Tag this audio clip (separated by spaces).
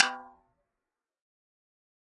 1-shot velocity multisample tom